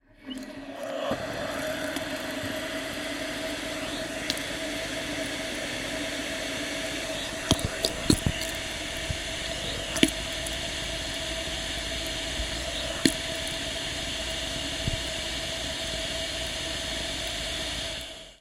Tinnito - robinet - eau coule - blops - démarrage - C411
Playing with tap, waterpipe and contact microphone.
Zoom F4 + AKG C411
drone, water-pipe